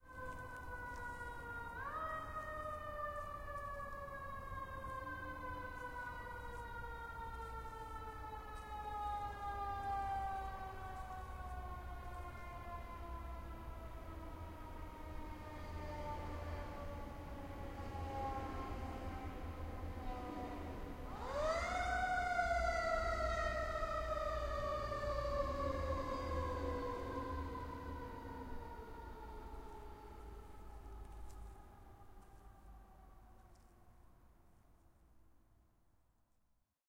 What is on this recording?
Equipment: Tascam DR-03 on-board mics
A fire engine passes by slowly with it's sirens going about 100 yards away.